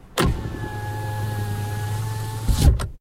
car windows roll up
A Simple car window rolling up. A shortened version of Car Power Window from theshaggyfreak.
car, window, automobile, vehicle